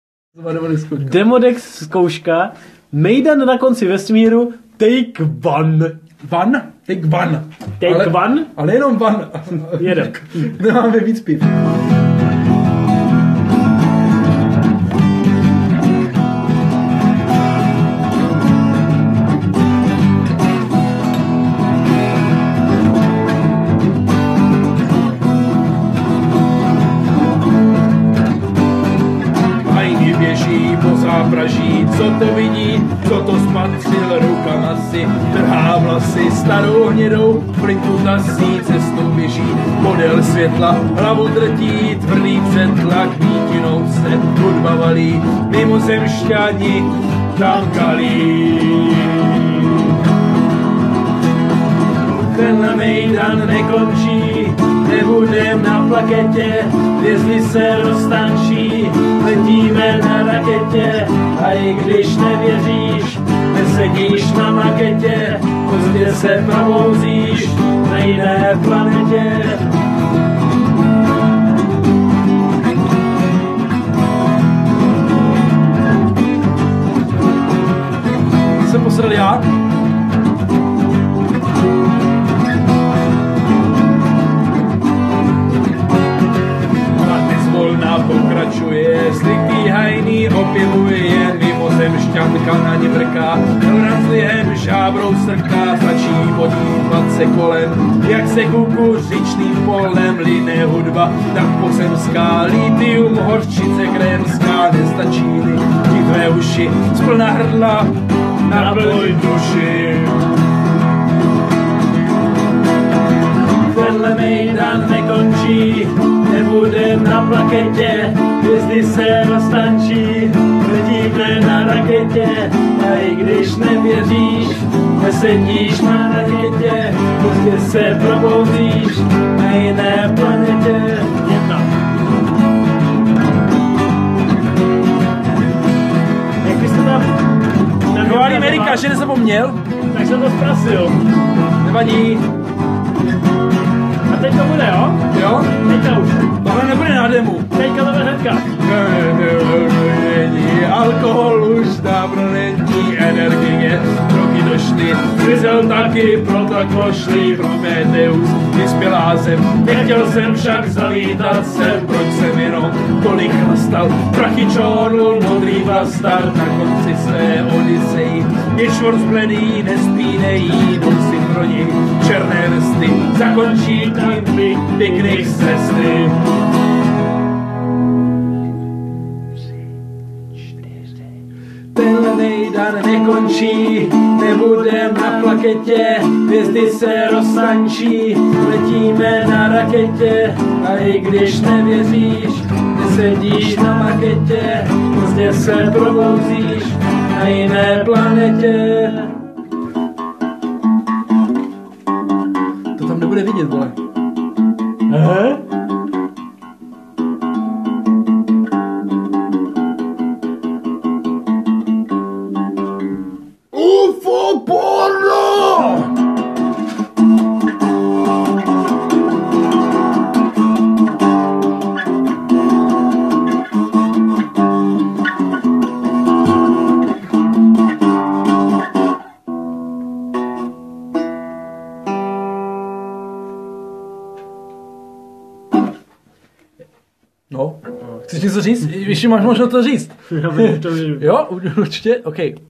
Mejdan na konci vesmíru
Ukázka kapely Demodex - Brno
instrumental, folk, punk